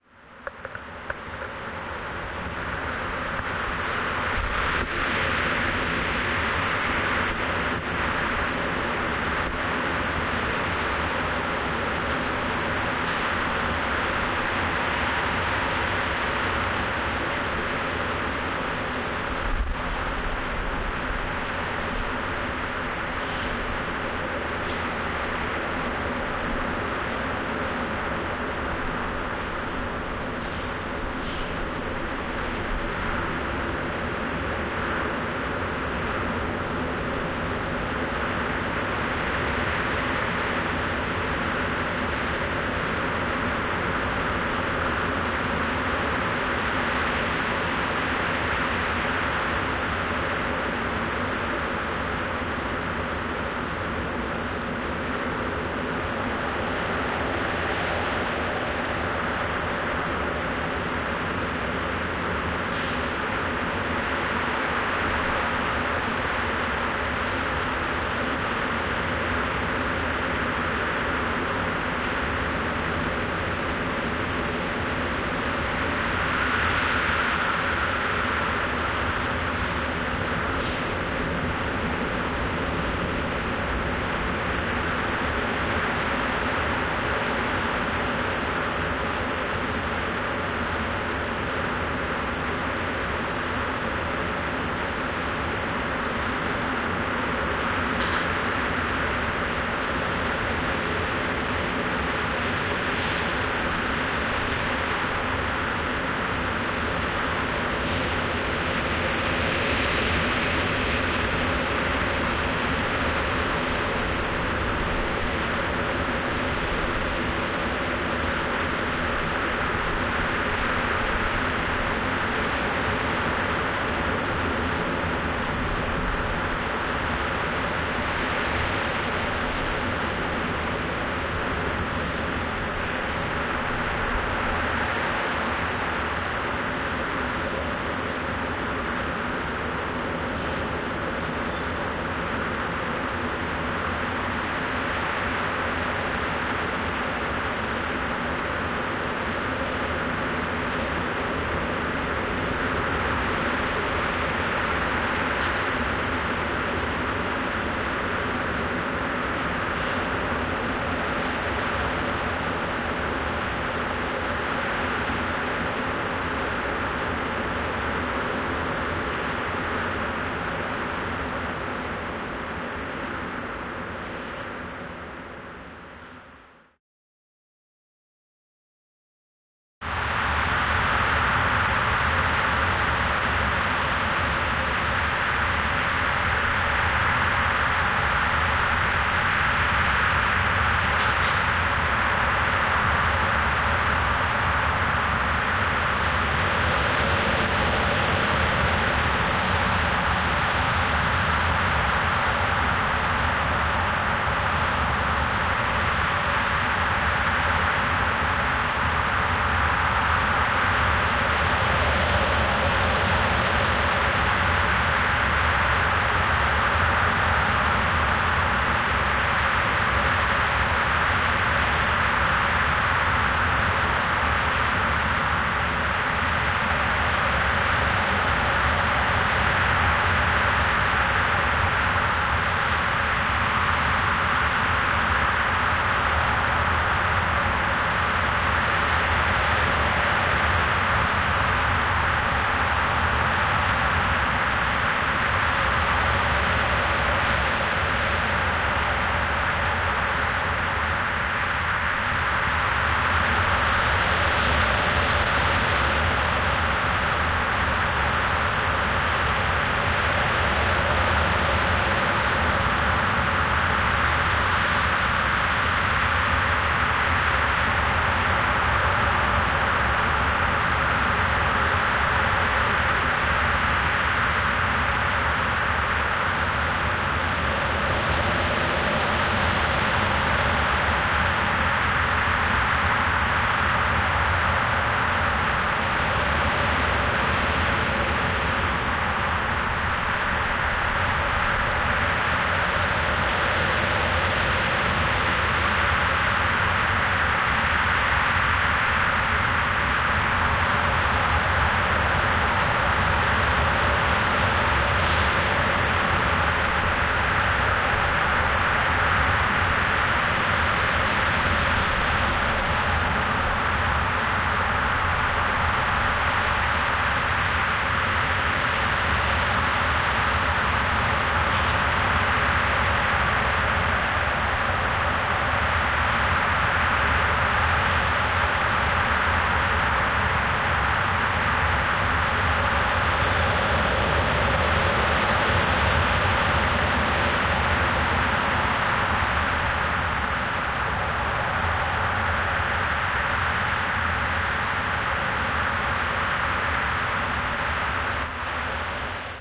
Traffic Background FX - light to heavy
Some freeway sounds recorded in Portland
Enjoy.
Evil Ear
ambience, cars, city, freeway, motorway, noise, road, traffic